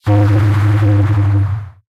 bass satkan
detuned, filtered and distorted sound of a car passing
effect, dark, low-end, processed-sound, sub, wobble, cinematic, boom, bass, rumble, distotrted, low, deep, hit, sounddesign, fx